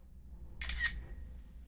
The sound of a camara when you make a photo.
Camera, Foley